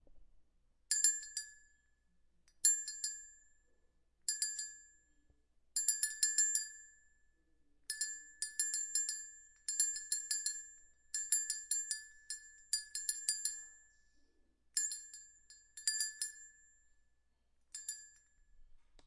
Cow Goat Bell Vaca Carneiro Sino Polaco
Bell, Bells, Carneiro, Cow, Goat, Polaco, Sino, Vaca